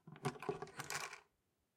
Wooden Chest Lid Latches Open
Raw audio of the latches on a wooden chest being lifted up. These latches serve as a very simplistic locking mechanism and there are two of them in total, each one of which is placed on either side of the front of the chest. Recorded with a ZoomH1
Note: The quality of most sounds tends to increase dramatically when downloaded.
Unlock, Latches, Chest, Stereo, Metal-Hinges, Release, Wooden, Zoom-H1n, Open